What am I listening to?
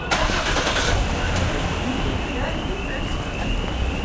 Car engine starting, there's an alarm sounding in the background as well as some mumbling people. Unprocessed field recording.
engine, car, start, field-recording